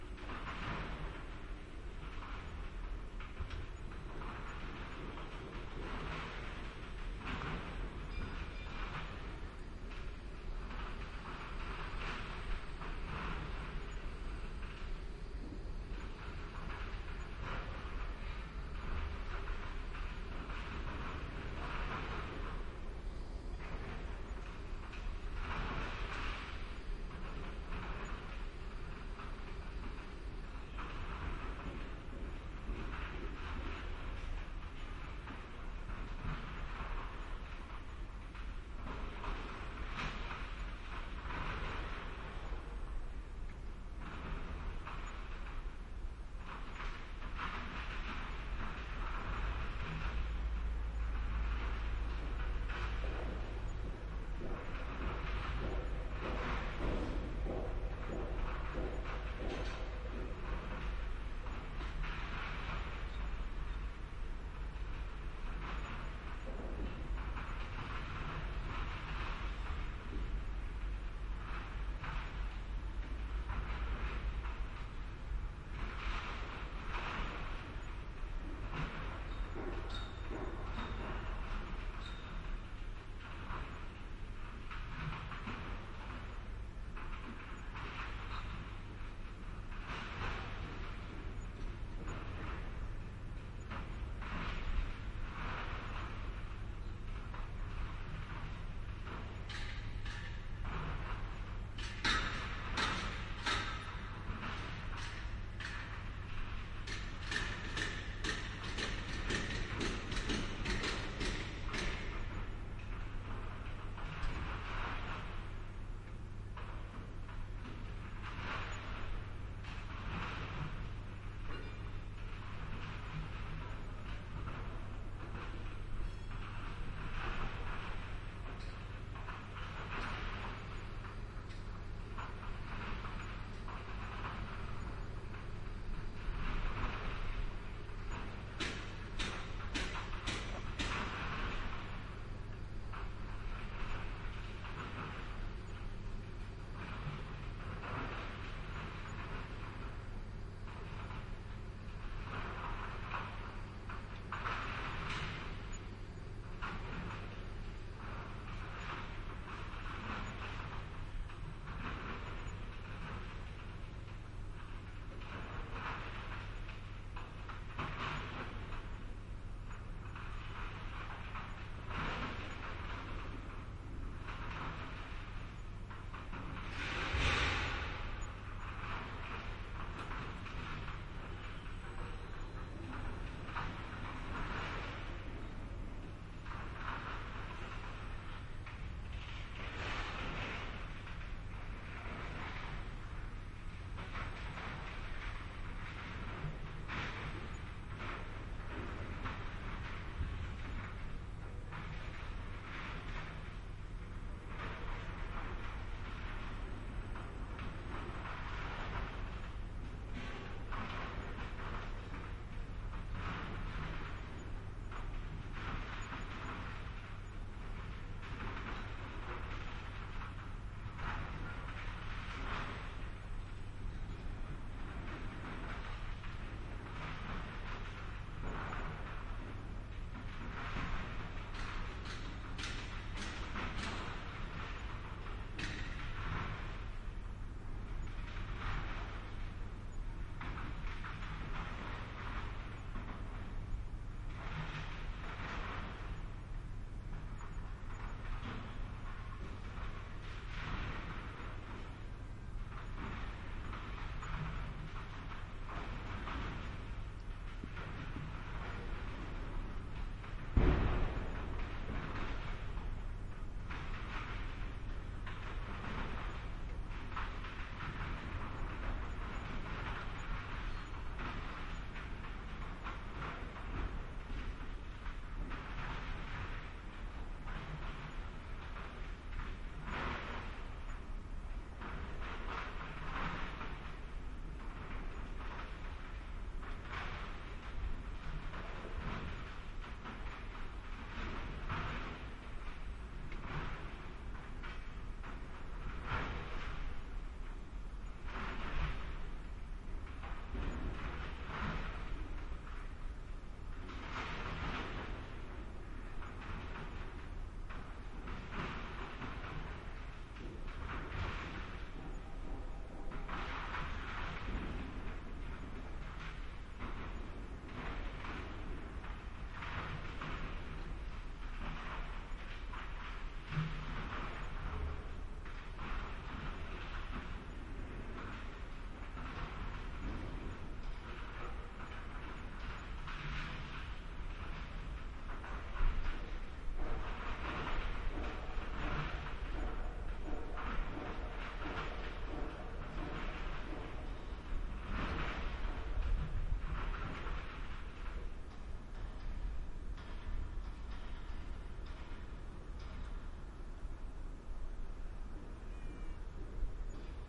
Construction yard v04
atmosphere on a construction site
atmosphere, construction, building